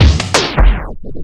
Experimenting with beats in analog x's scratch instead of vocal and instrument samples this time. A broken drum loop for rabid techno freaks and jungle dance nerds.